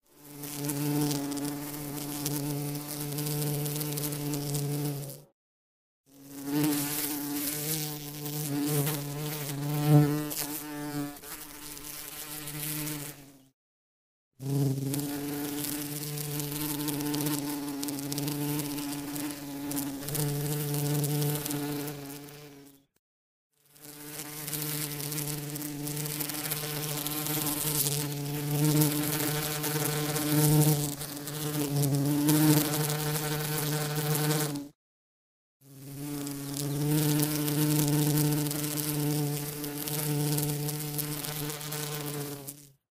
Insect - Bee - Stereo
She bumbled into my living room, I recorded her and then let her fly away :)
insects, buzzing, buzz, bees, insect, fly, bee